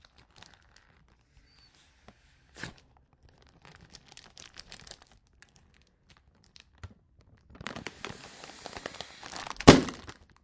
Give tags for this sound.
bag
crack
pop

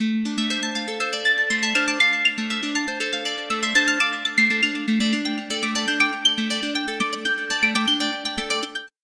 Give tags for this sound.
random
sequence
synth

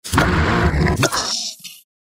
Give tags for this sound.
beast
beasts
creature
creatures
creepy
growl
growls
horror
monster
noises
processed
scary

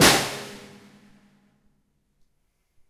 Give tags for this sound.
snare
tama
kit
live
drum

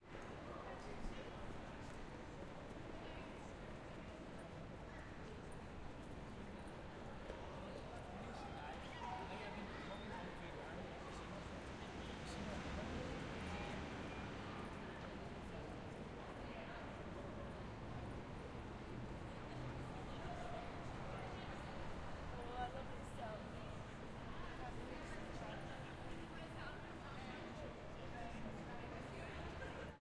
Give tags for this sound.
walla
H6n
Glasgow
Ambience
crowd
city
traffic
people
field-recording
street
Zoom

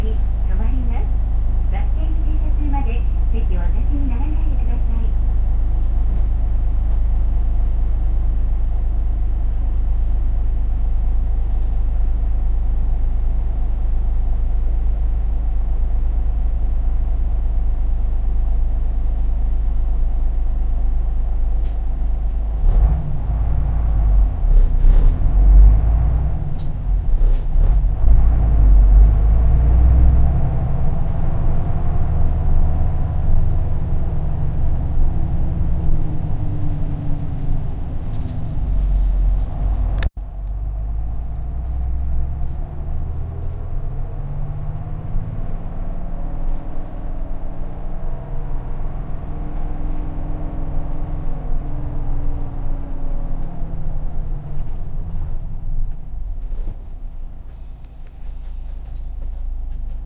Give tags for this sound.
interior
bus
japan
background
life
engine

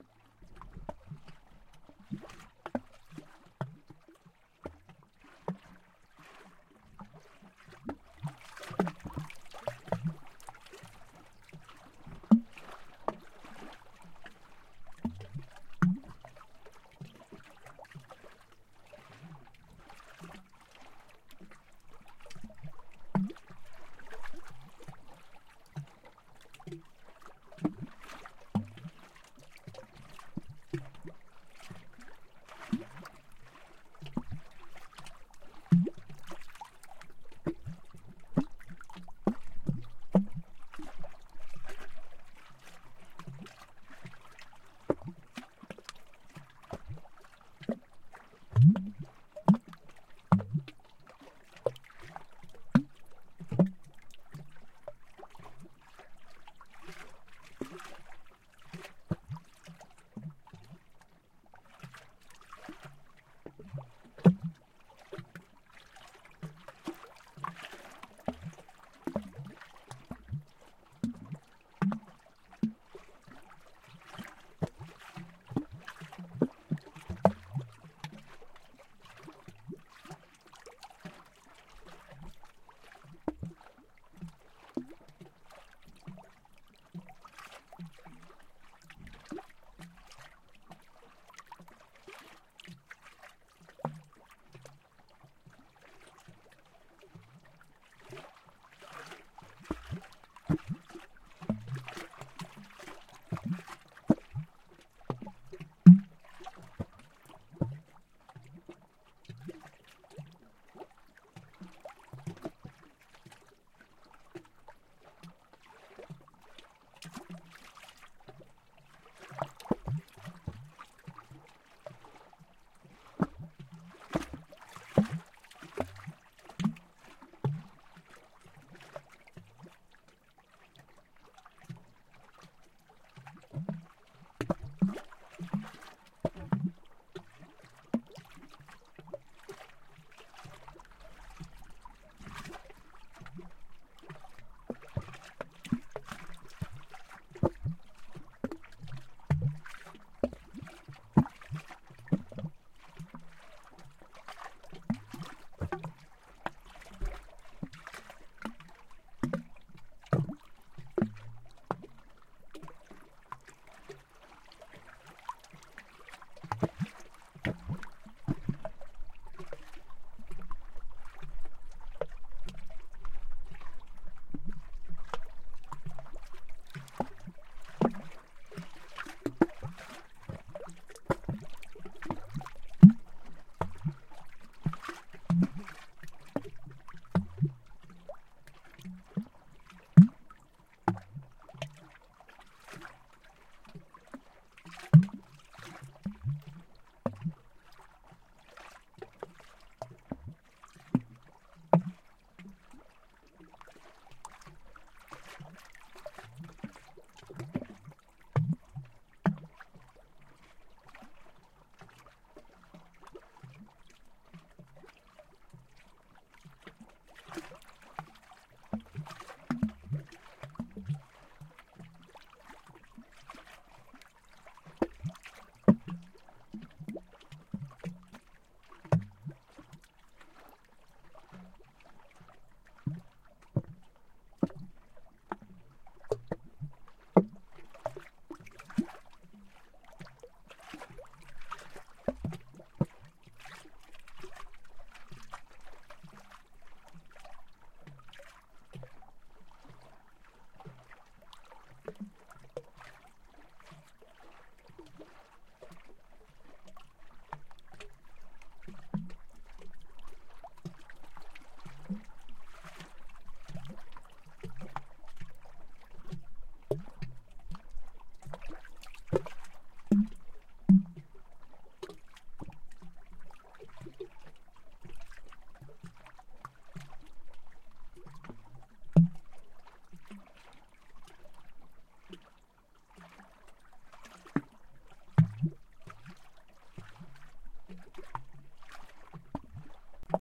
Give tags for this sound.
field-recording
outside

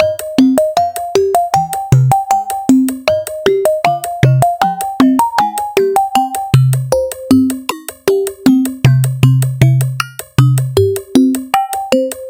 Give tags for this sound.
78BPM
minimal
loop
dance
electro
electronic
rhythmic

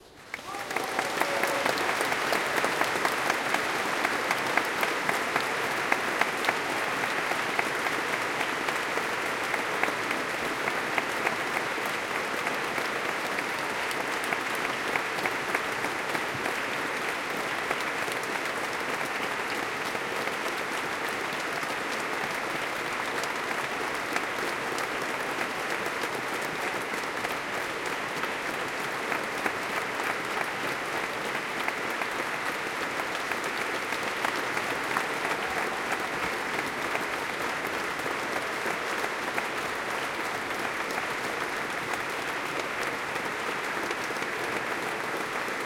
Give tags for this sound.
acclaim
cheering
cheer
applause

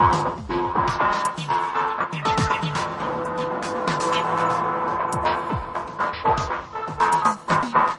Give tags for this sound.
design
rhythmic
beat
MetaSynth
rhythm
drum-loop
loop
120-bpm
sound
fx

